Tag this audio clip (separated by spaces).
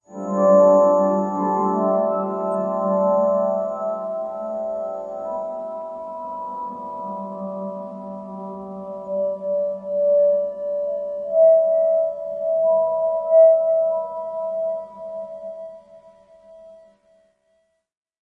ambient atmospheric piano pretty smear tone wash